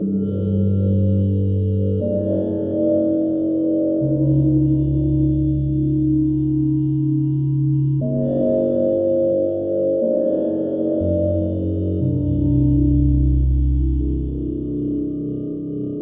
Slow Spooky Synth
A fairly dramatic (albeit mellow) phrase that could be used to signify an uncomfortable thought or feeling.
sinister, horror, scary, fearful, dream